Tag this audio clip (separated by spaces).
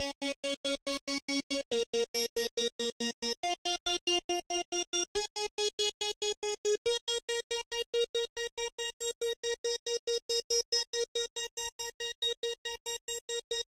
sounds awesome chords hit drums digital drum video samples loops game synthesizer music melody synth sample loop 8-bit